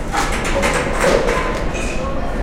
Subway Dropped Can Noise
can, city, dropped, field-recording, metal, musical, new-york, nyc, platform, public, tin